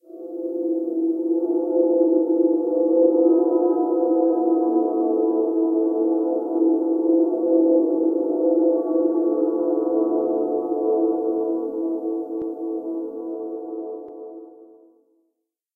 Patch #?? - This version is diluted with reverb. Abstract. >> Part of a set of New Age synths, all made with AnologX Virtual Piano.
synth, loop, new-age, sad, ambient